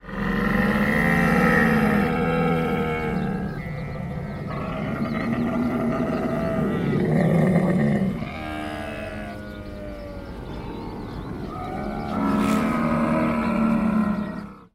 S035 Camels Mono
Camel groans and moans